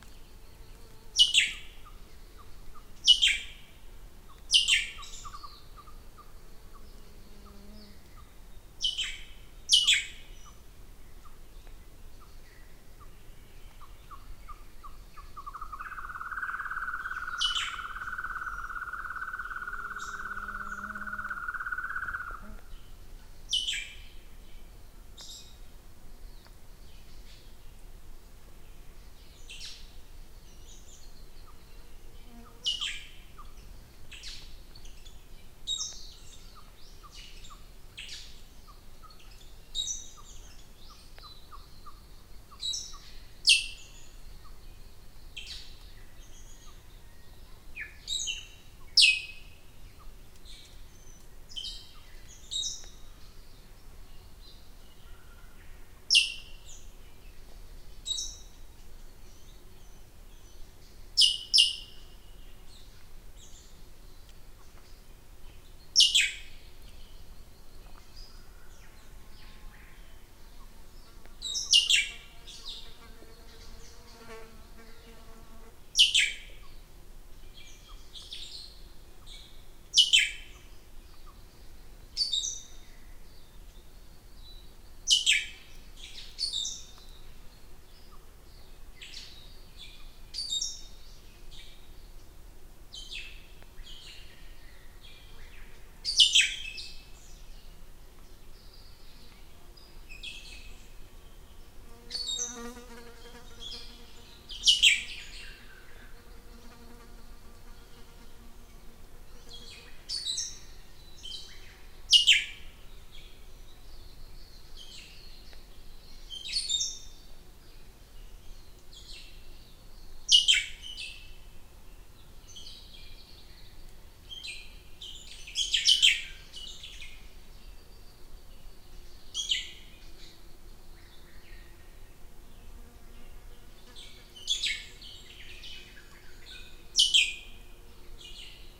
Lake King William Ambience
Morning ambience beside Lake King Willam, Tasmania: Grey Shrike-thrush, raven, wind increasing at 0.30, occasional rain drops. Recorded at 11.30 am, 27 Feb 2014 with Marantz PMD661, using a Rode NT55.
Lake-King-William, Tasmania